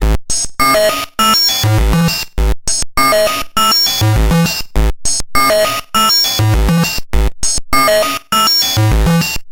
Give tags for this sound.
robot synth tinker

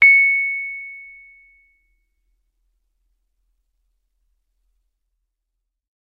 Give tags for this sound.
multisample keyboard tube electric rhodes

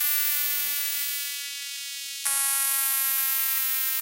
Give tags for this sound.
experimental multisample reaktor weird

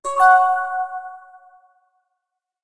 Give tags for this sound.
effect jingle

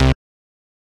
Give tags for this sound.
lead bass nord synth

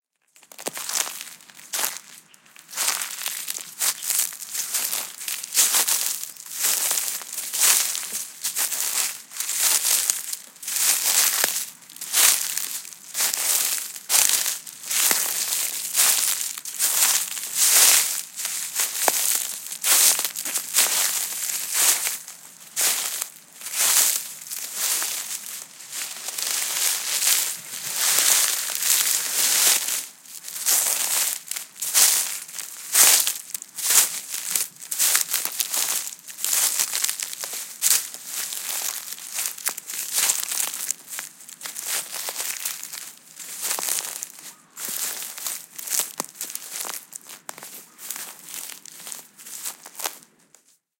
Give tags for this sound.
footsteps grass leave walk walking

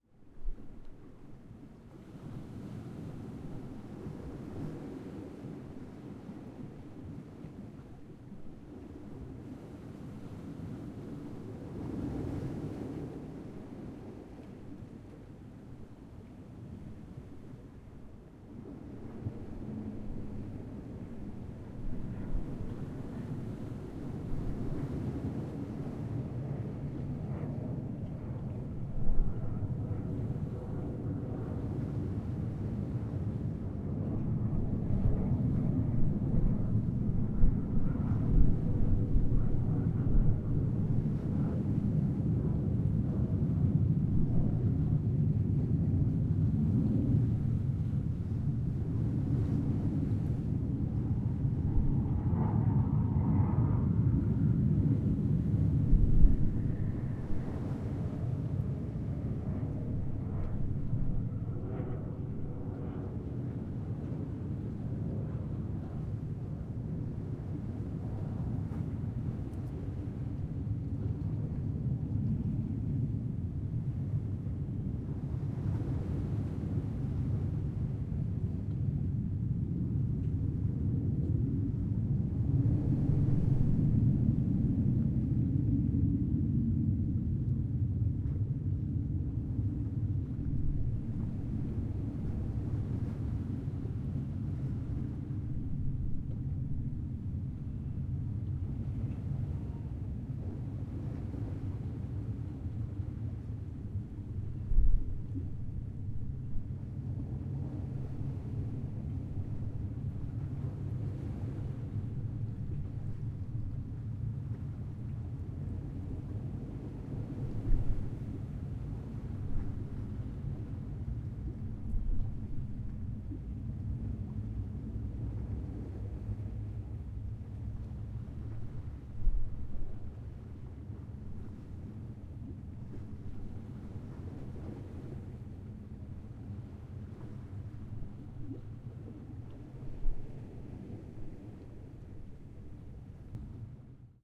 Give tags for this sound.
italy waves aircraft fighter ambience stereo soundscape noise bay jets nature ocean livorno leghorn beach ambient mediterranean sea aircrafts water

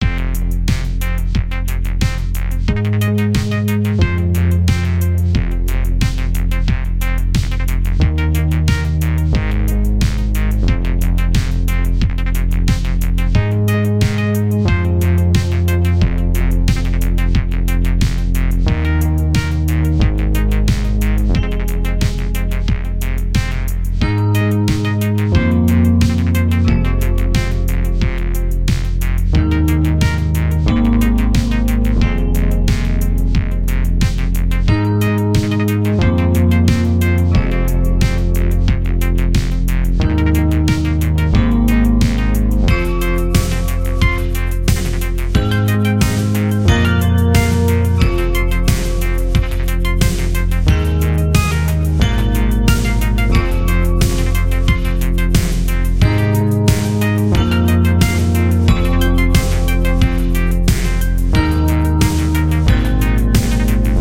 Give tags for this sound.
synth synthwave house loop hardware experiemental digital analog glitch electro arturia electronic novation techno dance edm korg

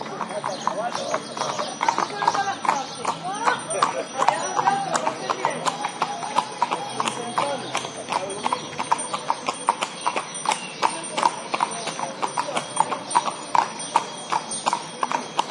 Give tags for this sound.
ambiance carriage field-recording horse park voices

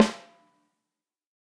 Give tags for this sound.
velocity 13x3 snare c720 multi tama drum fuzzy sample josephson

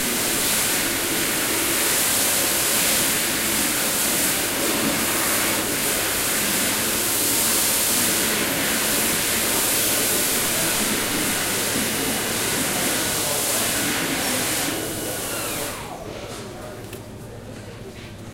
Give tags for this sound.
field-recording machine atmosphere

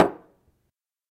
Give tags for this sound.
tuba drums percussion

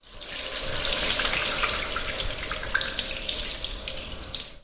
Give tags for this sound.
Flow Hands Washing Water

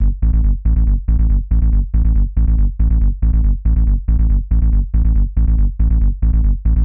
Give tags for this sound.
bass processed electronica synth dance loop

